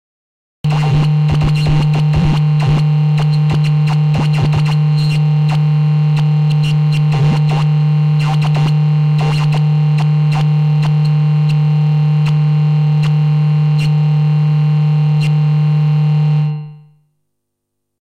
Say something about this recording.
Made on a Waldorf Q rack
interference, radio, static, buzz